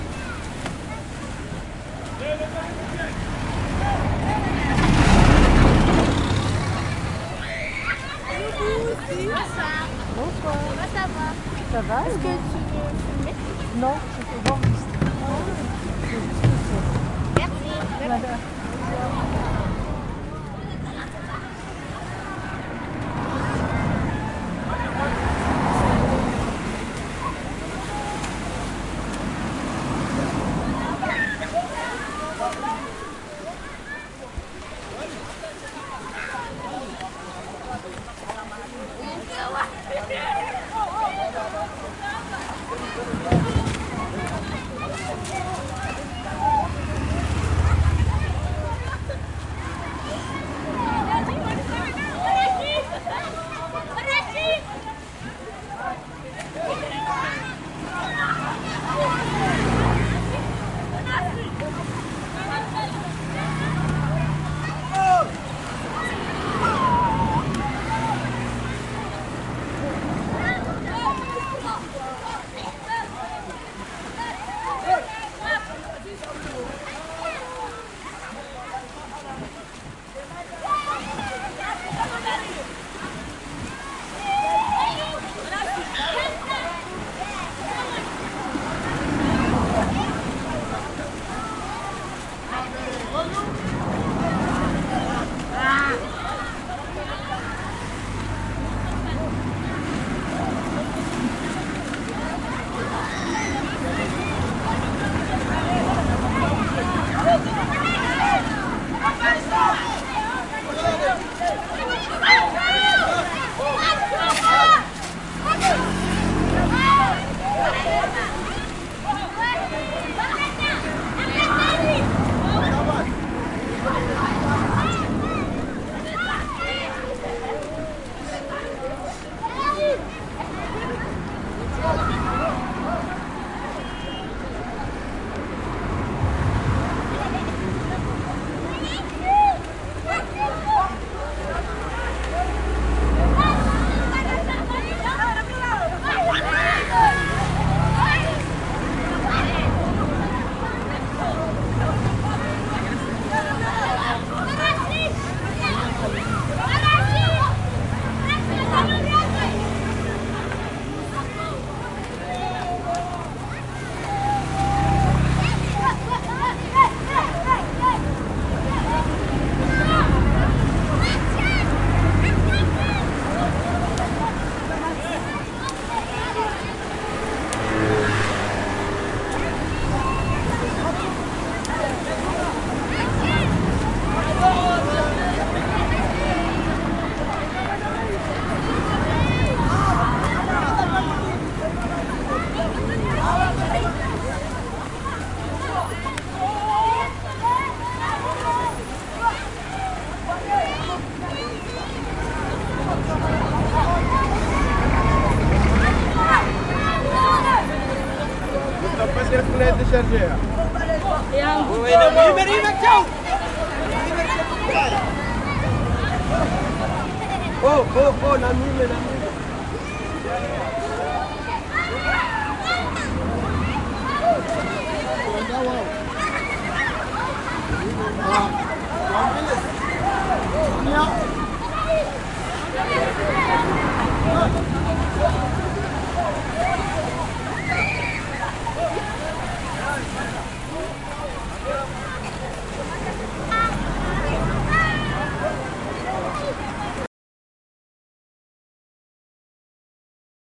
MORONI COMOROS ISLANDS CHILDREN PLAYING SEA
With ZOOMH4N
Recorded in the evening, Moroni, Comoros Island.
Children playing in the Indian Ocean. The small beach is next to the main road of the island. Some traffic around.
En fin de journée, à Moroni, aux Comores. Des enfants jouent dans l'océan. Ils sautent dans l'eau, s'interpellent. Le lieu de la baignade est à côté de la route nationale. Trafic de voitures en contrepoint.
enfants, Island, ocean, water, waves